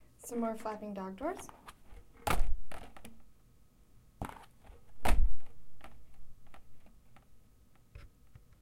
slamming dog door
door, slamming